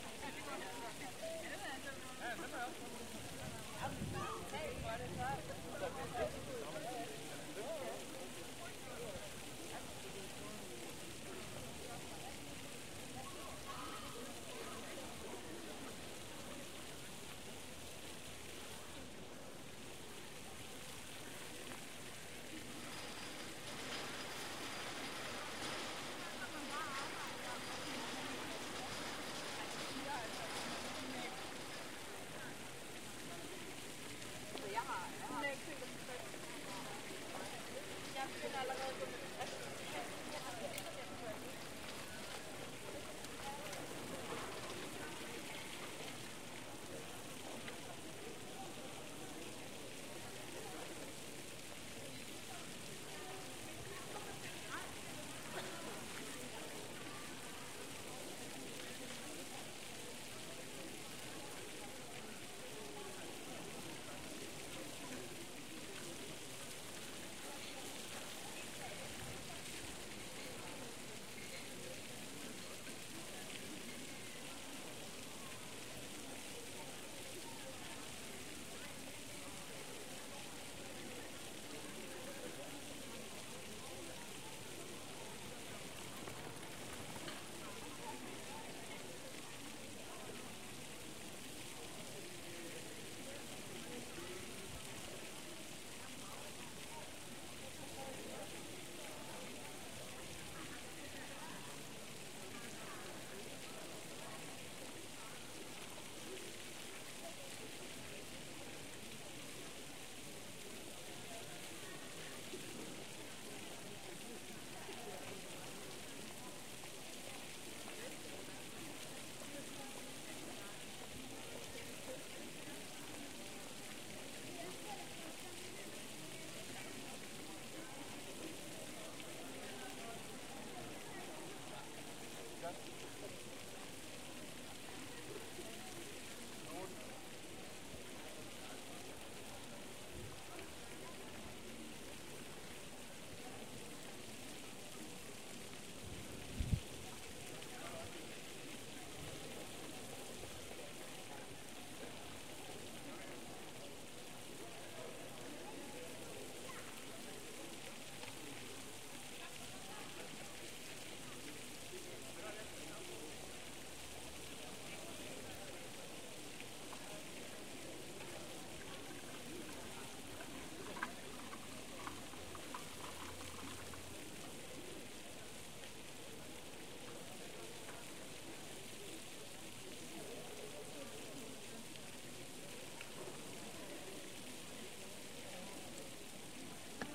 The heart of the center of Copenhagen, HNZOOM4 with an air filter. Stereo. Kultorvet is the name of the place. There is a fountain in the background